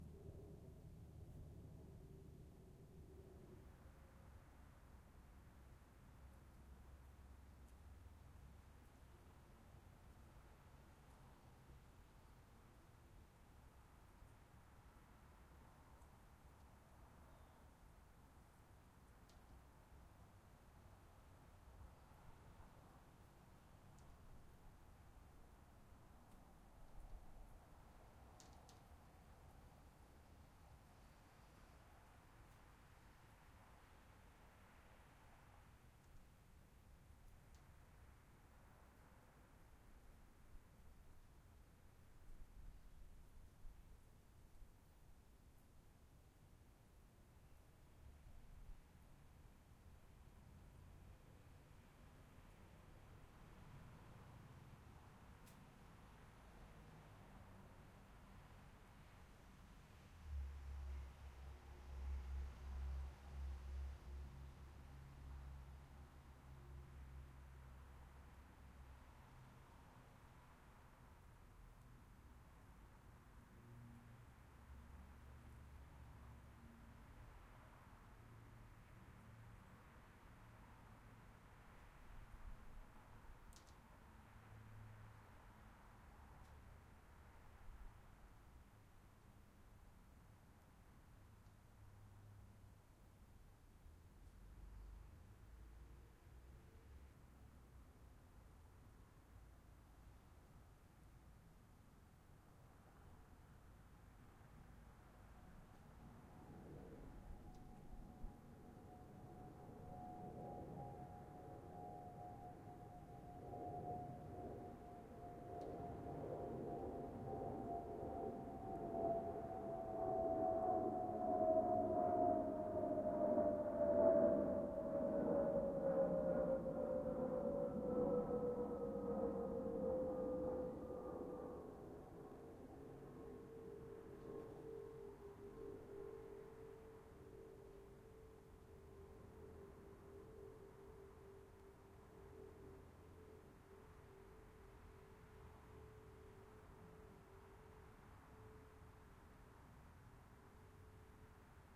Background DeltaBC Backyard Birds Traffic Jet
Sitting in my back yard to capture some ambience. Used a Zoom iQ5 and an iPhone 6plus. The green house was rattling with the wind also. Enjoy